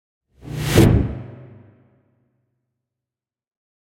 SFX Thrilling Build-Up and Hit 2 (Made at Paradise AIR)
I recorded a lot of sounds in the area, and edited them into a series of thrilling sound effects.
build-up, thrilling, tense, climatic, cinema, tension, SFX, thrill, dramatic, cinematic, futuristic, action, rising, crescendo, increasing, movie, hollywood, approaching, thriller, suspense, drama, climax, intense, threatening, appearing, fear, filmic, film